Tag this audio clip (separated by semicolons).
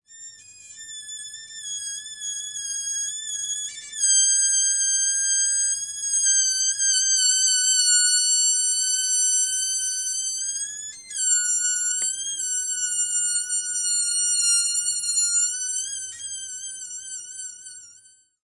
insect; Mosca; fly